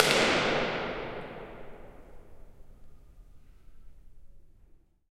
Staircase Impulse-Response very long reverb drop shoe
Inside a staircase we dropped a shoe from the highest point possible. This is the result. Very cool for convolution reverbs!